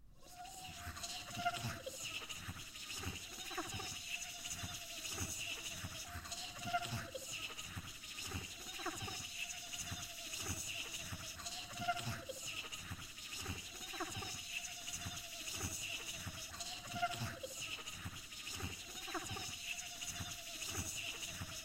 A vocal ambiance made by laying my own voice twice doing some high pitched chittering sounds and adding some echo.